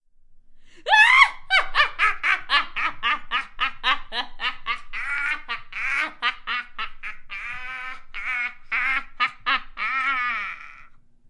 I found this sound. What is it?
Stupid Witch
I tried to sound like an old, crazy witch, but it sounds like she has a couple other problems.
chortle, creepy, demon, giggling, girl, granny, insane, maniacal, stupid, voice